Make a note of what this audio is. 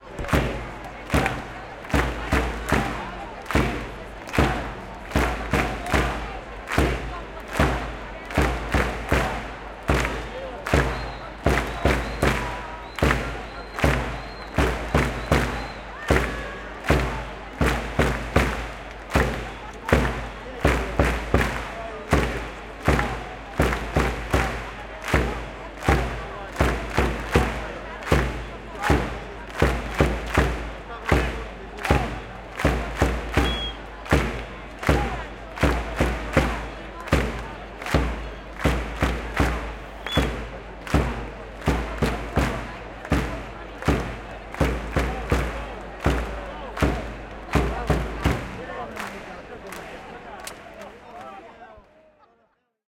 11 setembre 2021 in inde independencia amb bombo de fons
independencia, setembre, de, inde, 11, fons, street, barcelona, bombo, 2021, amb